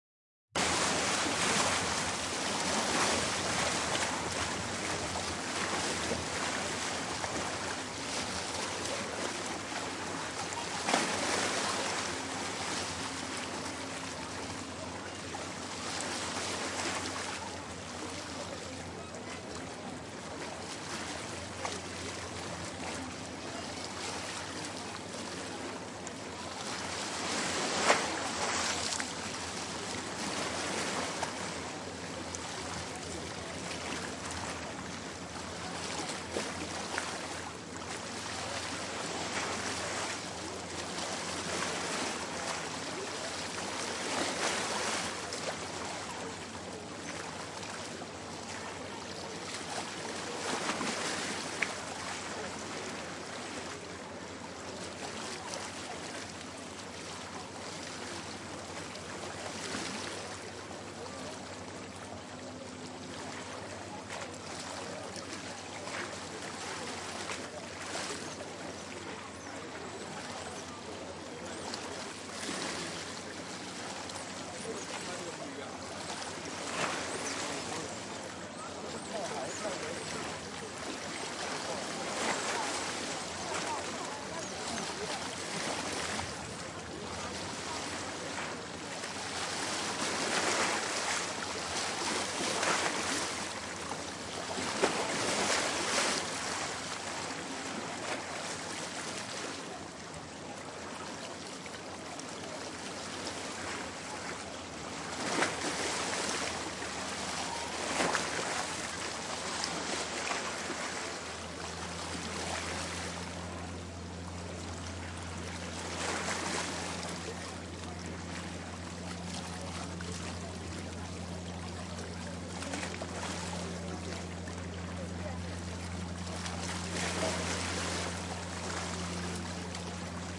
Taipei Tamsui River Side
River side field recording at Taipei Tamsui(大潮之日)
h2, zoom, water, field-recording, river